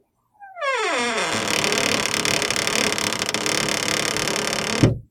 Door-Wooden-Squeak-0009
This is the sound of a common household door squeaking as it is being opened or closed.
This file has been normalized and most of the background noise removed. No other processing has been done.
Squeak, Wooden